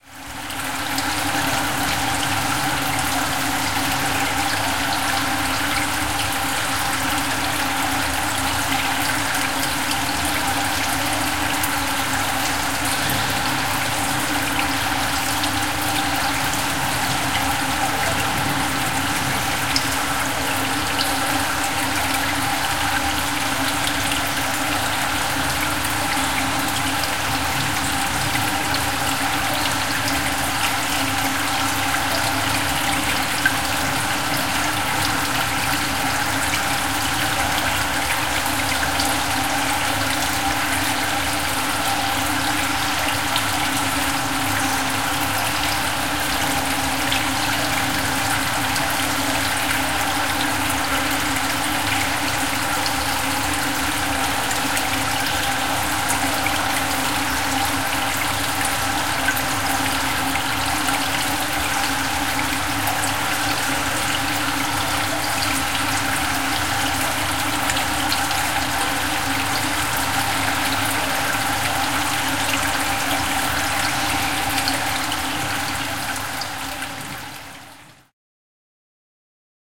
WATRDrip-close-int indoor-pool ASD lib-zoom-H4N-stephan
Sound of an indoor overflowing pool.
indoor, pool, drip